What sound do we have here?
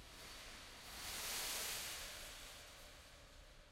Metal fence breathing slowly
Fence hit with interesting envelope.
fence, metal, slow, texture